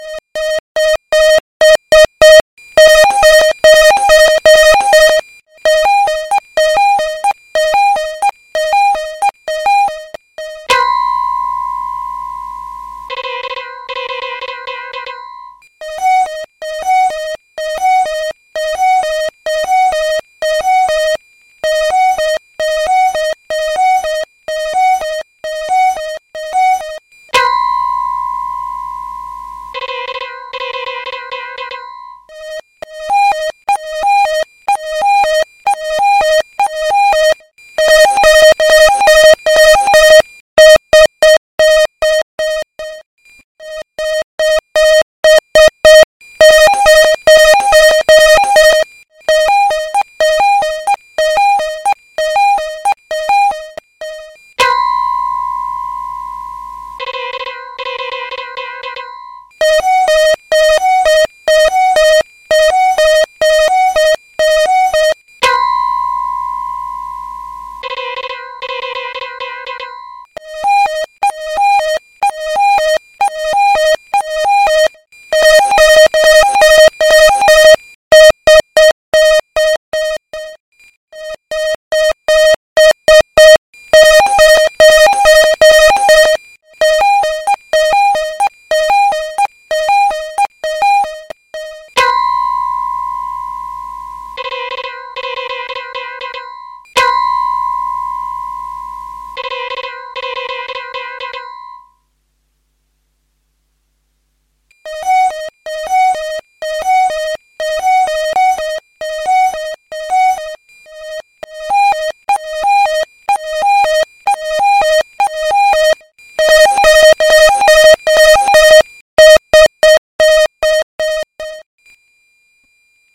Beacons are kind of navigation and identification signal stations which requires that a spacecraft tells who they are and what is their business and goal. This beacon is heard clearly because it's quite near Earth, only 200 millions kilometers. The signals is like music, but has nothing to do with that.
broadcasting, lighthouses, navigation, radio